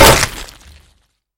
Trench Club 2
A WW1 Trench Club SFX, filled with gore. Created with Audacity.
Bloody, Club, Trench